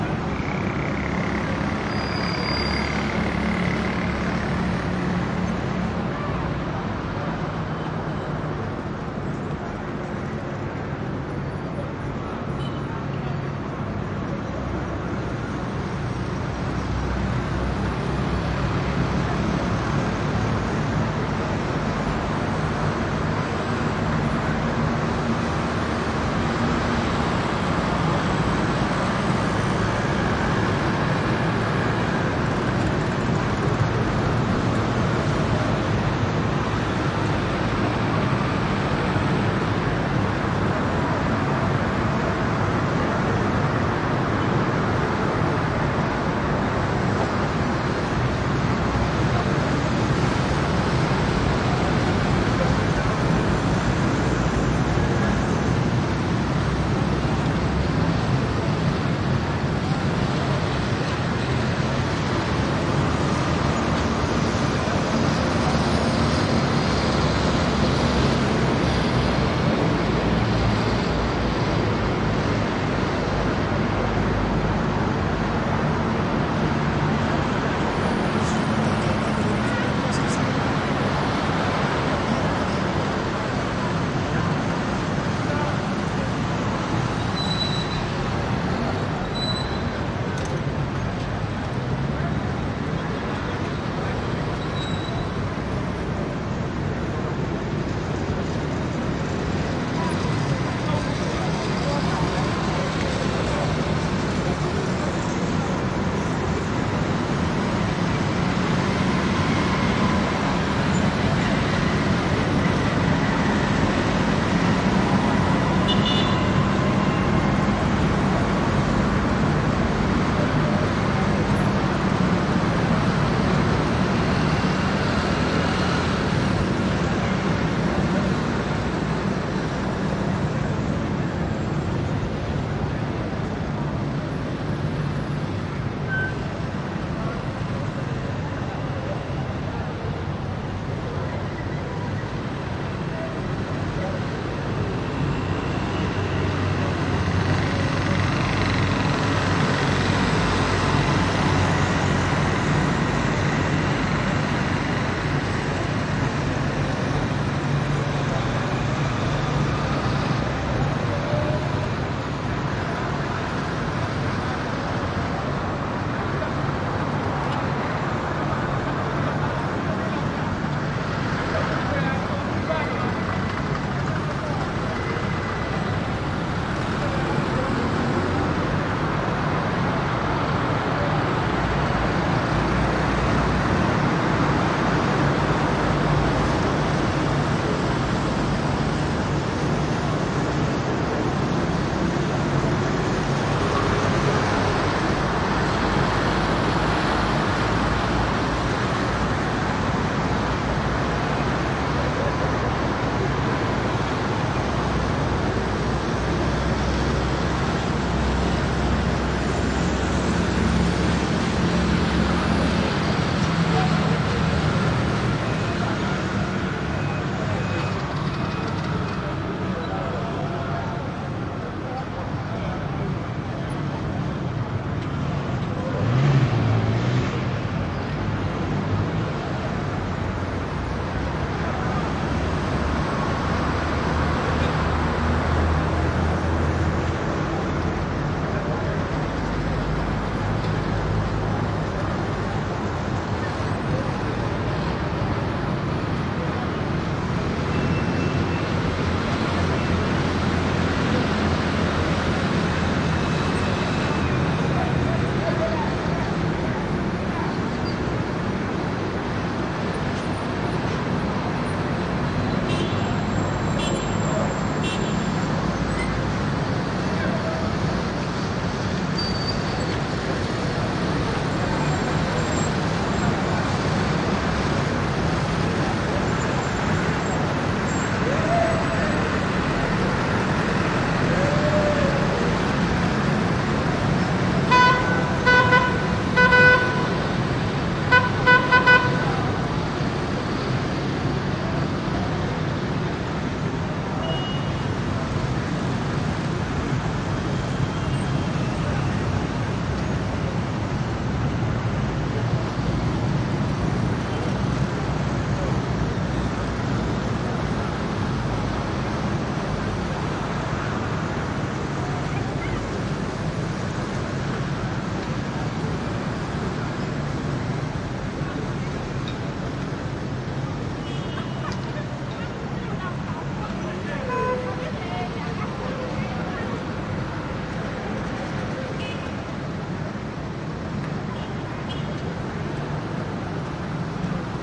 skyline traffic heavy wide boulevard scooters mopeds throaty dense semidistant +honking at end Ouagadougou, Burkina Faso, Africa
traffic, heavy